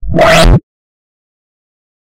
Another break-noice I created using the Grain app.